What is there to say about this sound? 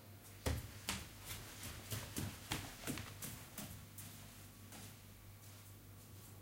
naked feet walk rapidly on flagstone